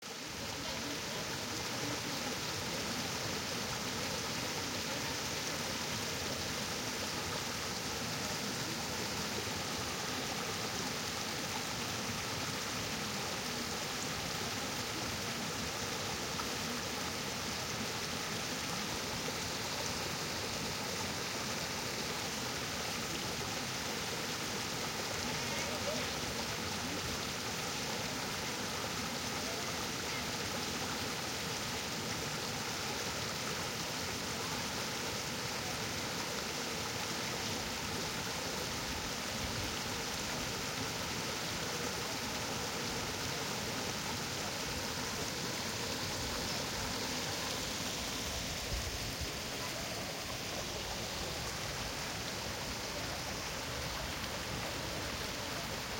Sound of a mountain creek flowing